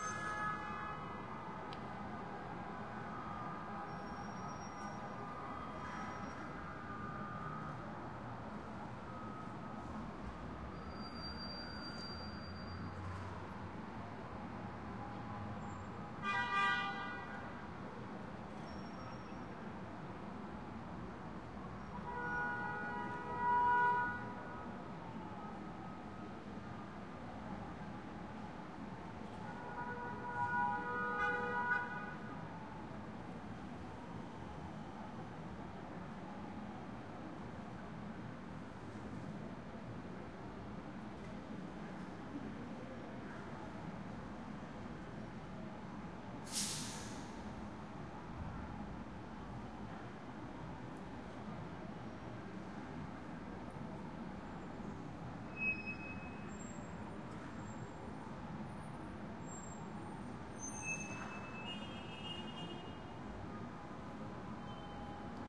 Far Away City Traffic Ambience
Recorded with a stereo handheld Tascam recorder. Recorded on the 9th floor of a building in Manhattan, NYC.
Trains, Background, Travel, Film, Passing, Traffic, Locomotive, Motorway, City, Ride, Public, Far, Ambience, Away